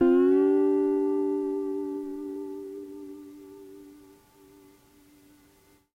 Tape Slide Guitar 17
Lo-fi tape samples at your disposal.
collab-2, guitar, Jordan-Mills, lo-fi, lofi, mojomills, slide, tape, vintage